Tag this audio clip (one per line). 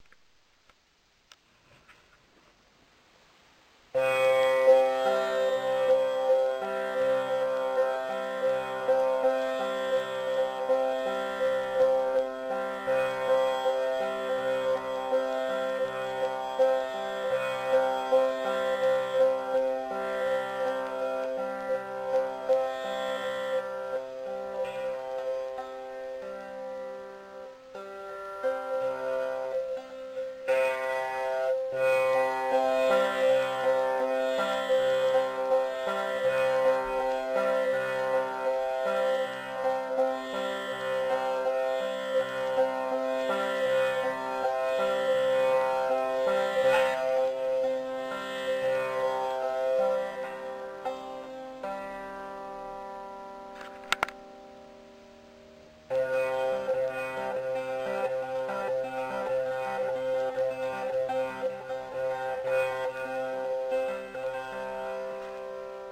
atmosphere
c
eastern
indian
raga
tambura
tanpura